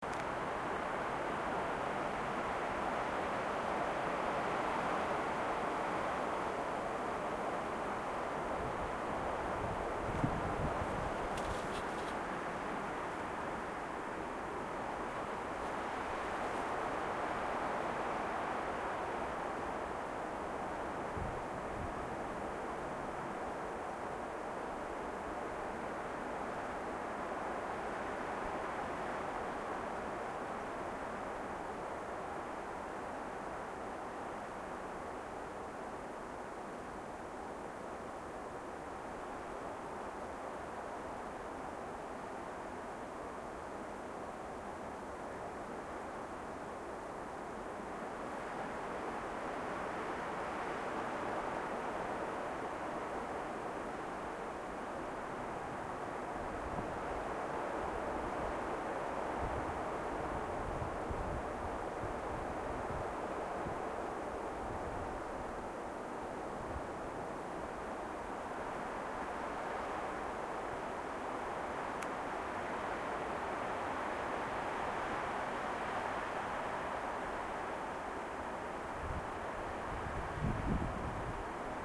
Wind Rustling Trees
A 1 min. 20 sec. Some blowing on the microphone is present but just enough so that it adds to the effect. It is a loop-friendly clip. Recorded with a black Sony IC voice recorder on a winter night.